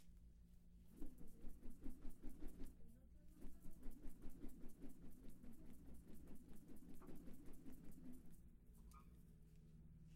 Spin rope in air.